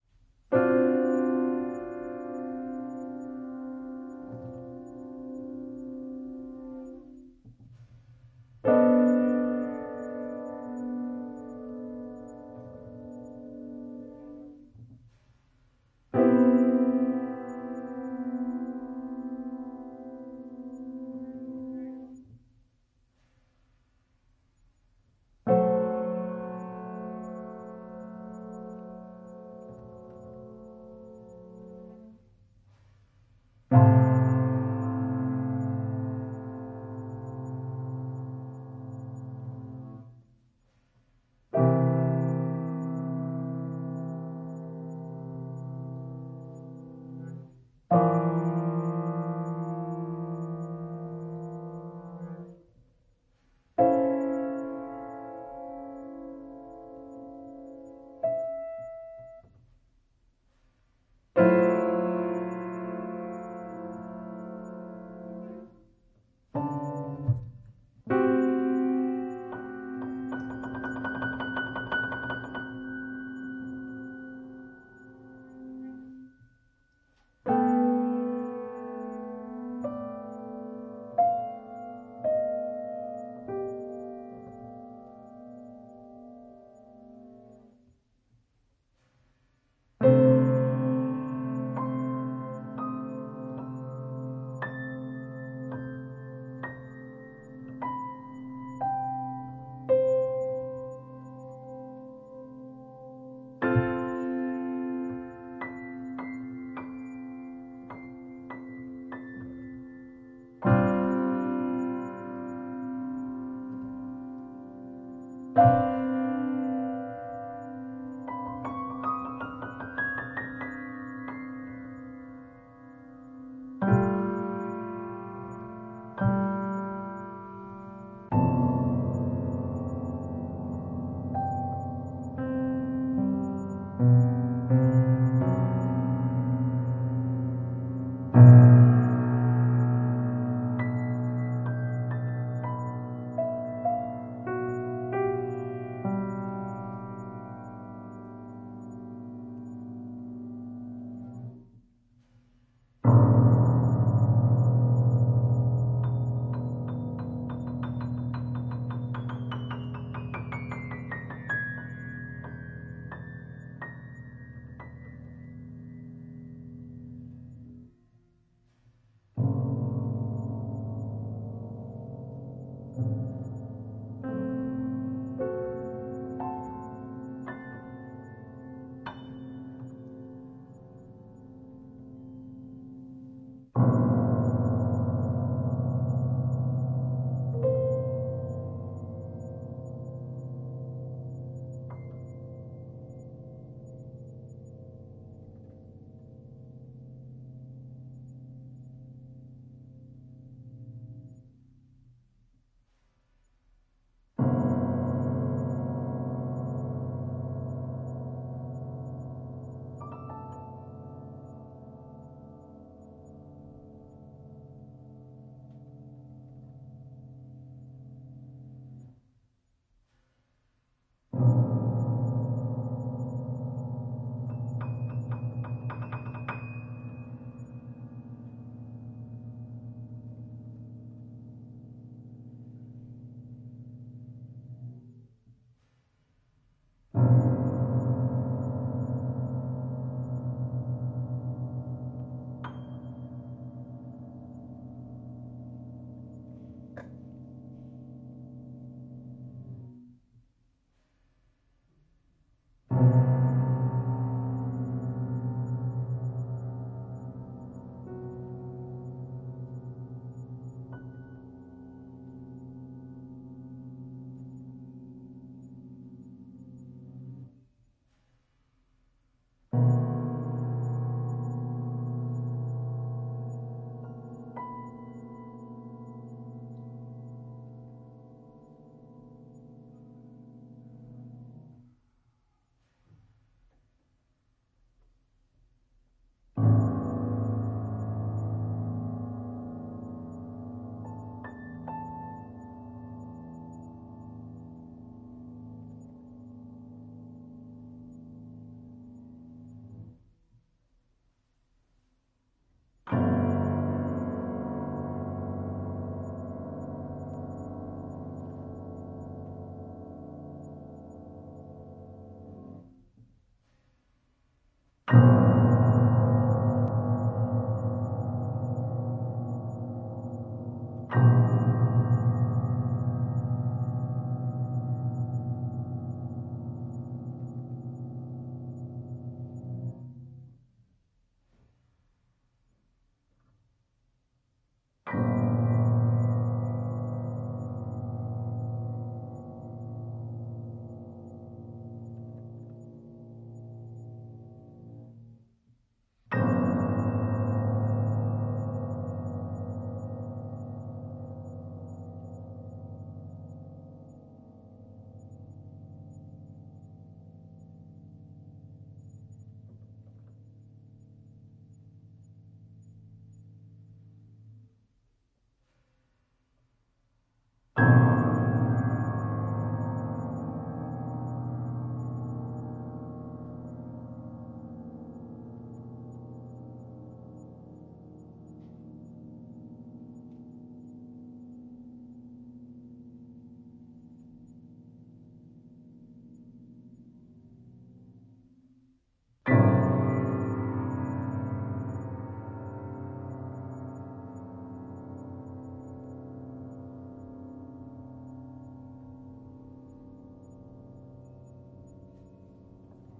Piano Improvisation
Improvisation, Instrument, Piano